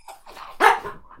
Aggressive Barking Dog - 1
Jack Russell Dog trying to bite something.